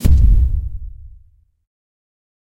Pop down impact 1-2.Without attack(4lrs,mltprcssng)
Normal swish hit sound. Enjoy it. If it does not bother you, share links to your work where this sound was used.